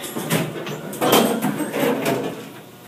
elevator opening
Dover Impulse traction elevator
Recorded in 2012 with an iPhone 4S
opening, open, doors, door, elevator